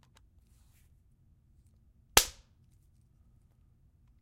Belt Smack
Smacking a belt together